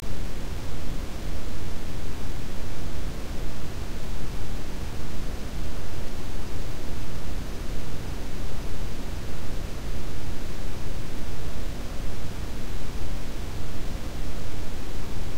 Rain - Rpg
A background retro rain loop, created in Milkytracker from
white and brown sound waves.
If you use the resampler of your audio editing software,
you can create different effects with this sample.
Sounds like: "rain from indoors", "heavy rain", "light rain" etc...
This sound, as well as everything else I have uploaded here,
is completely free for anyone to use.
You may use this in ANY project, whether it be
commercial, or not.
although that would be appreciated.
You may use any of my sounds however you please.
I hope they are useful.